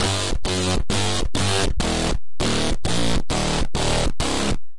100 Dertill n Amp Synth 03
bit, crushed, digital, dirty, synth